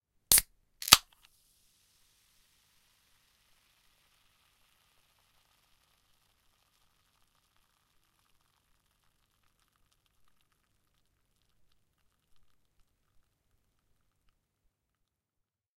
Fizzy Drink Can, Opening, E
Raw audio of a 330ml Pepsi can being opened. The initial fizz after opening is also present.
An example of how you might credit is by putting this in the description/credits:
The sound was recorded using a "H1 Zoom recorder" on 17th April 2017.
can, carbonated, coke, cola, drink, fizz, fizzy, open, opening, pepsi, soda